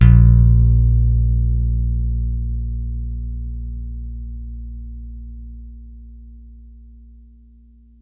TUNE electric bass